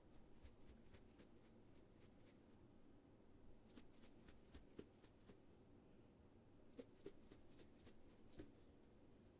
Dinner table salt shaker being shared.
kitchen, salt, shaker